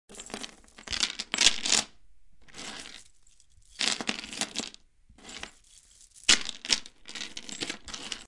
Change Rattle
My apologies but I've confused my recording of a chain with for my recording of change. Seems like it would be obvious enough, but I guess not. Either way, this is the sound of a small chain recorded by a shotgun mic and is loosely being dragged around the surface of a cardboard box.
chain, effect, foley, metal, rattle, sample